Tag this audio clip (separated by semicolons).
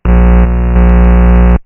electric; error; mic; microphone; pulse